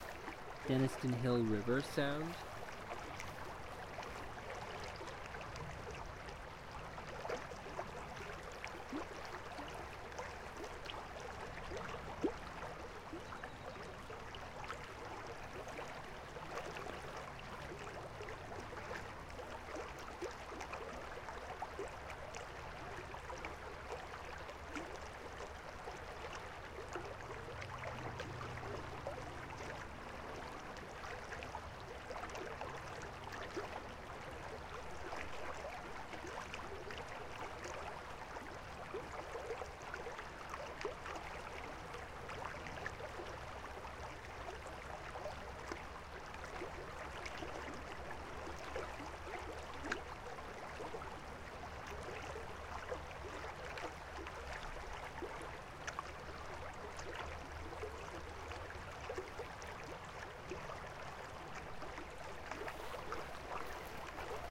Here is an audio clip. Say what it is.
000102 0180S4 denniston hill river sound
A stream about 3 meters wide, flowing quietly. NTG-2, Tascam-DR60D
brook, flowing, river, water, babbling, gurgle, creek, trickle, stream, bubbling, flow